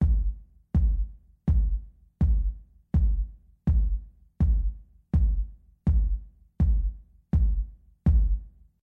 Kick - Four on the Floor
floor, kick, loop, thump